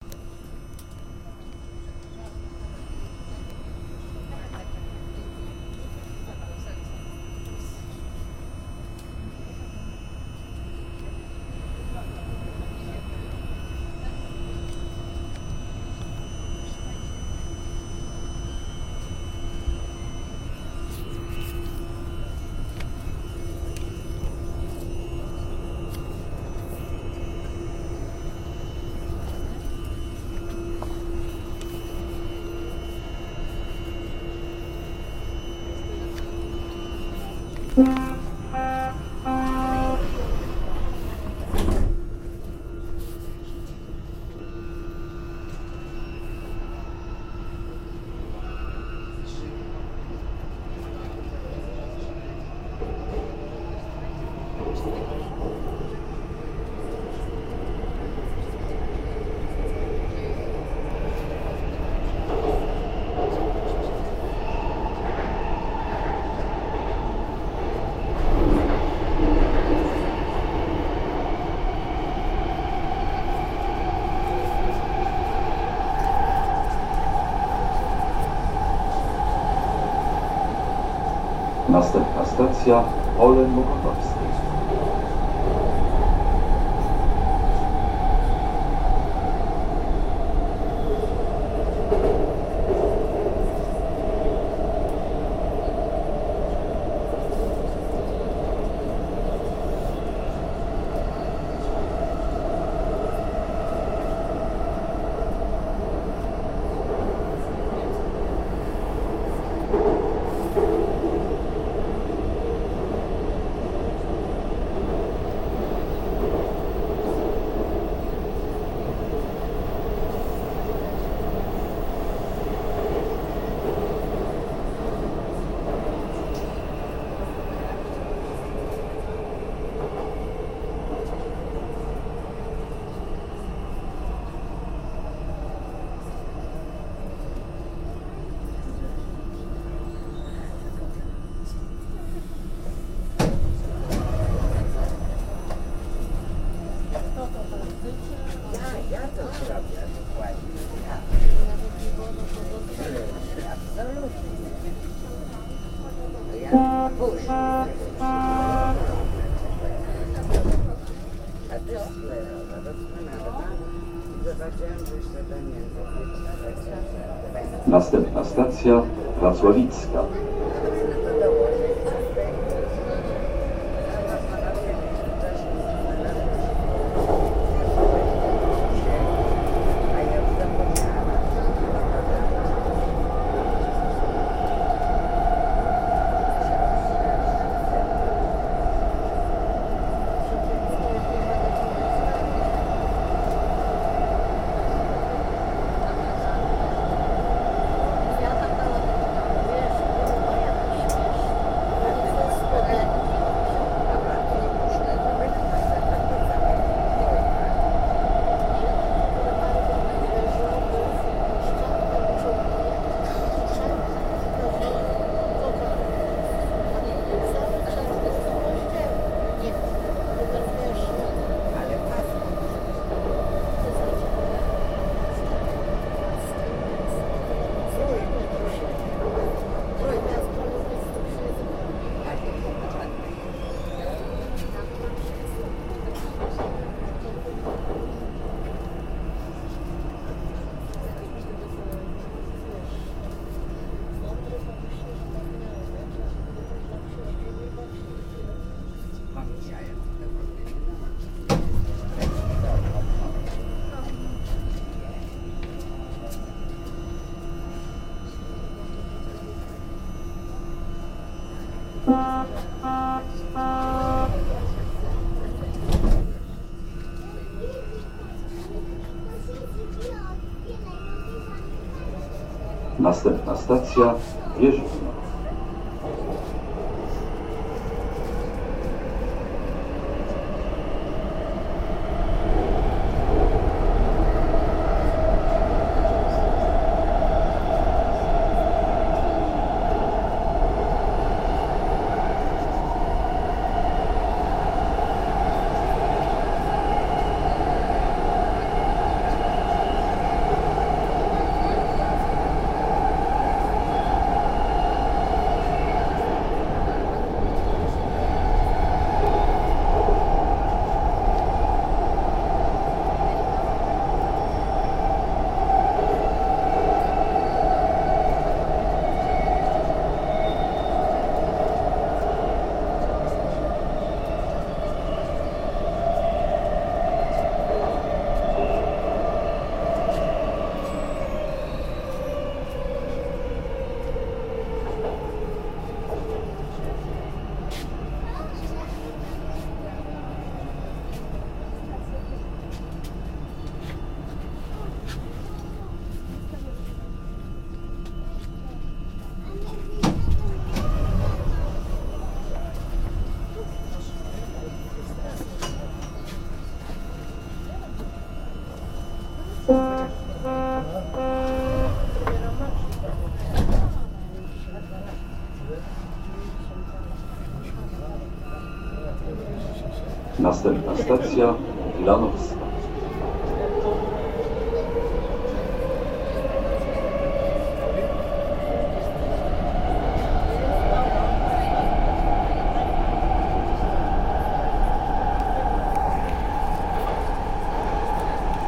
Metro Underground Tube Warsaw PL
Tube, Metro, Subway in Warsaw Poland
subway, tube, underground